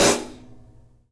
X-Act heavy metal drum kit. Zildjian Avedis Quick Beat 14". Recorded in studio with a Audio Technica AT3040 condenser microphone plugged into a Behringer Ultragain PRO preamp, and into a Roland VS-2400CD recorder. I recommend using Native Instruments Battery to launch the samples. Each of the Battery's cells can accept stacked multi-samples, and the kit can be played through an electronic drum kit through MIDI.